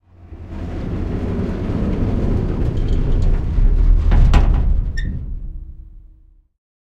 thin metal sliding door close
thin metal sliding door closing slowly
doors, metal, shut, sqeaking, hard-effect, hollow, door, field-recording, close, closing